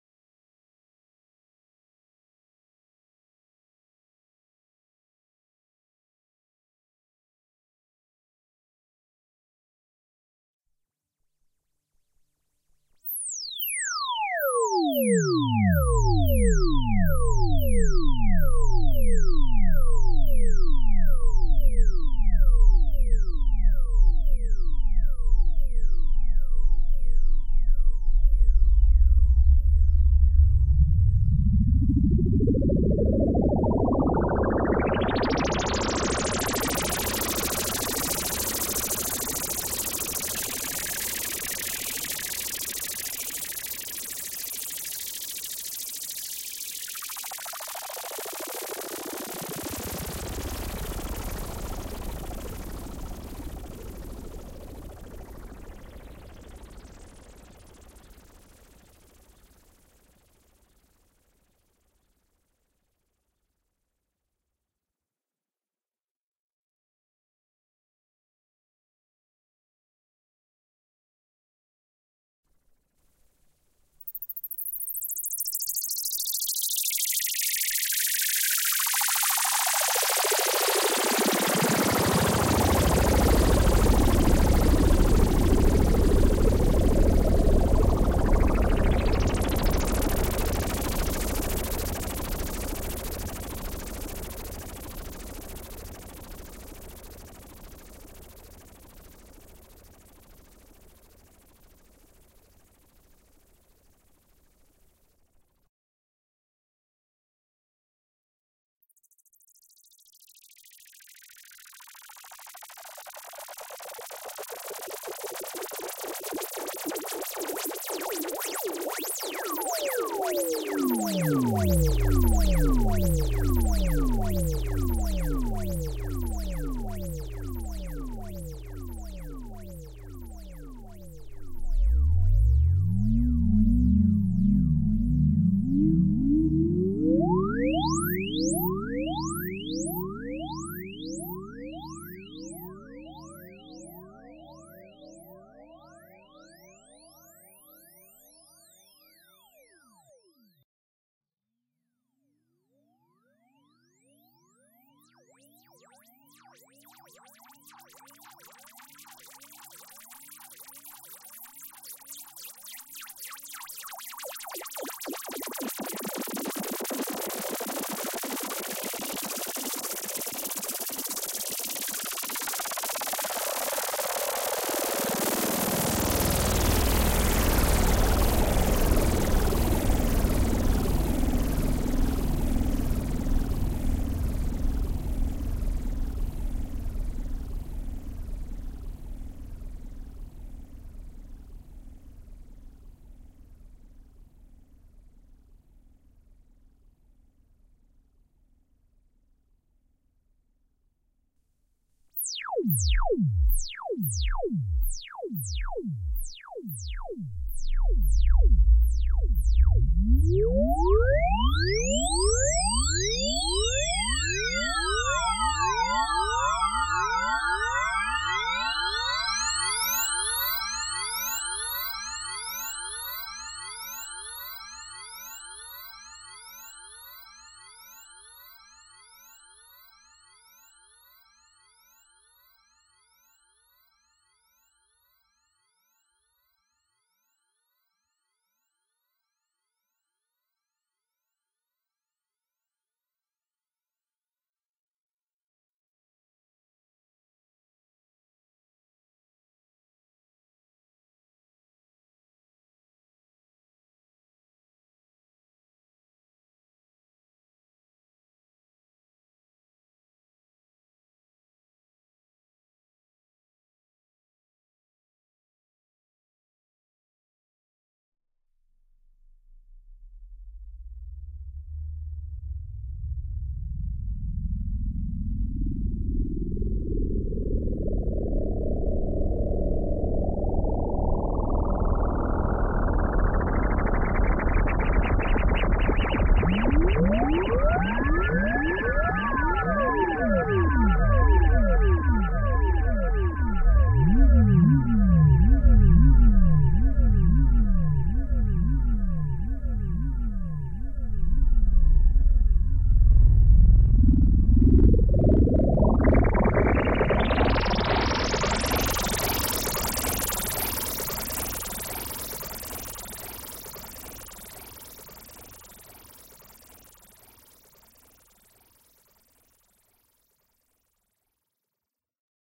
JENSX1000-SYNTHBLEEPS 1
This is from a collection of sounds I made from my 1973 Jen SX 100 monophonic oscillator synthesizer. I have a large collection of samples from this vintage piece of electronic history, and they fall into a variety of categories from 'random bleeps' to full 'pads'. The Jen SX 1000 was the first consumer 'affordable' copy of the minimoog synth with the main difference being that it didn't have a second oscillator to act as an envelope, but with the addition of a 'Zoom rack mount' effects unit, I can achieve many of the 'classic' moog sounds. More to come. If you use this sample, keep me informed as I am fascinated to hear the end result.
acid-rock, analogue, bbc-radiophonics-workshop, electronic, electronics, jen-sx-1000, psychedelic, retro, synth, synthesizer, tim-blake, vintage